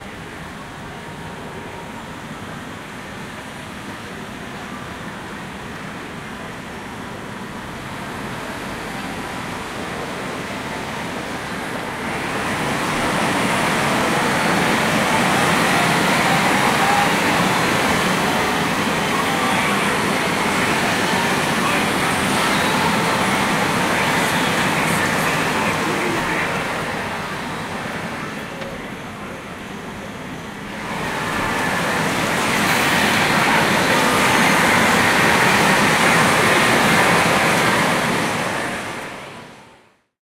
Japan Tokyo Shinjuku Pachinko Noise Doors Opening
In front of a Shinjuku pachinko parlor (casino/arcade). By far not the noisiest Pachinko place I heard In Japan. Somehow this one recording has a nice spatial (stereo) effect.
One of the many field-recordings I made in Tokyo. October 2016. Most were made during evening or night time. Please browse this pack to listen to more recordings.
casio, parlor, pachinko, Japanese, noise, Shinjuku, spatial, Japan, doors-opening, chaos, arcade, cacaphony, cacophony, Tokyo